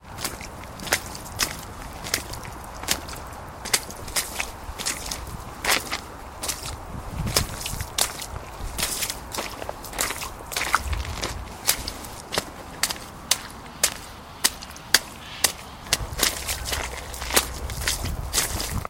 Footsteps, Puddles, D
Raw audio of footsteps splashing in small puddles and some mud. This is a combination of several raw recordings edited together. Apologies for the periodic wind interference.
An example of how you might credit is by putting this in the description/credits:
water, puddles, splosh, footstep, footsteps, puddle, step, foot, splash, splish, steps